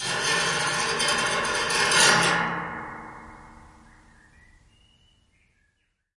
Ohrenbetaeubende Crispyness
My "Falltuer Samplepack" deals with the mysterious sounds i recorded from the door to the loft of our appartement :O
The Recordings are made with a Tascam DR-05 in Stereo. I added a low- and hipass and some fadeouts to make the sounds more enjoyable but apart from that it's raw